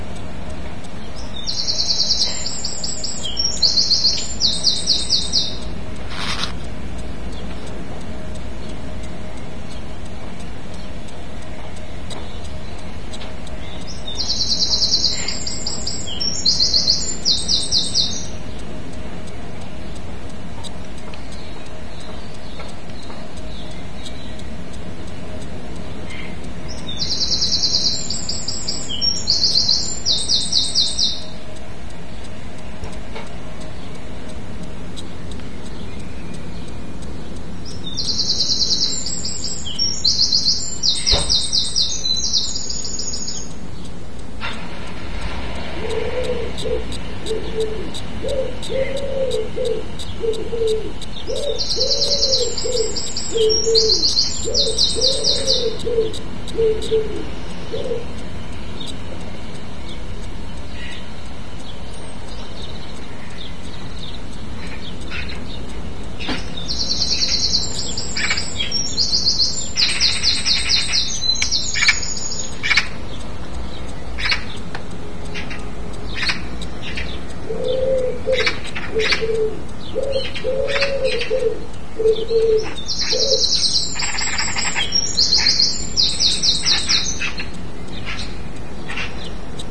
Wren joined by pigeon and magpies
A Wren singing it's heart out in the morning, with a Pigeon, a car and the local Magpies joining in later. Recorded with my Sansa Clip MP3 player's built in microphone!! I cannot believe how good this recording is. I amplified it 25% with Audacity before uploading. Recorded approx 7AM on May 18th 2011 in Exeter UK
wren, garden-bird, birdsong, loudest-voice, sansa-clip, smallest-bird, wren-singing, dawn-chorus